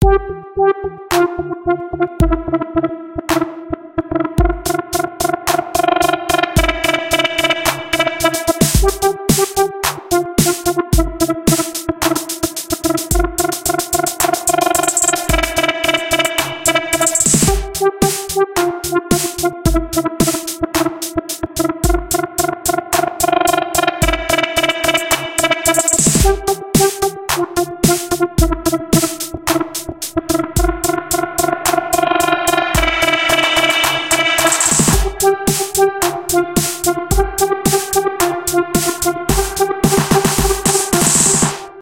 Stepy Loop
a quick demo like hip hop or trip hop